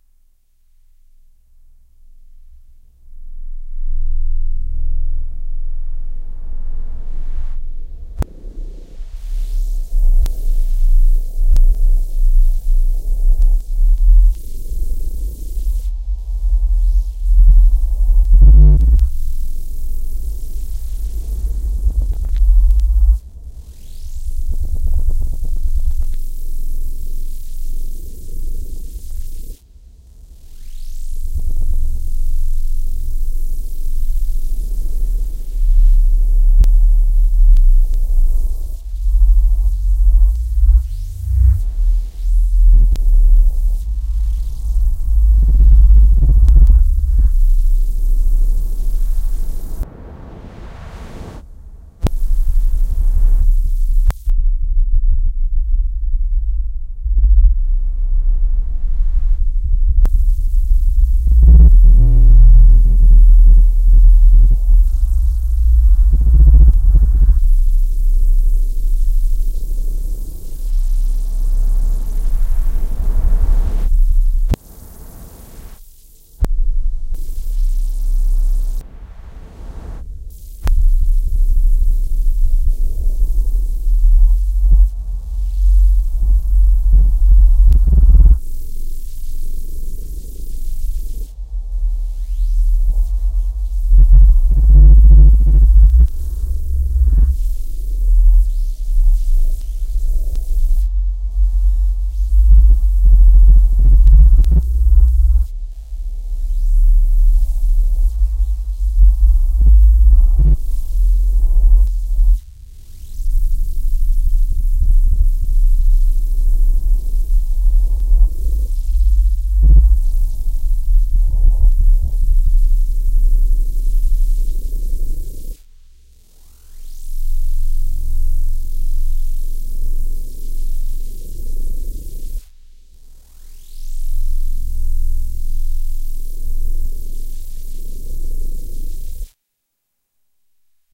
Labial Dub
Bass notes created with the Aethereal VST.
Effects: ASIO sound card bugs, echo, reverb.
After recording, the shape has been inverted
dark, darkness, osc, shape, sorrow